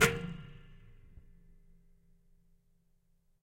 Scrape ff-10 015
recordings of a home made instrument of David Bithells called Sun Ra, recordings by Ali Momeni. Instrument is made of metal springs extending from a large calabash shell; recordings made with a pair of earthworks mics, and a number K&K; contact microphones, mixed down to stereo. Dynamics are indicated by pp (soft) to ff (loud); name indicates action recorded.
acoustic, metalic, percussive, rub, scrape, spring, wood